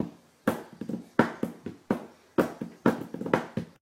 cedar chest 01 126bpm
This is a sample of me banging on a cedar chest in my living room. I recorded this sample using the Voice Memos app on my iPhone 4.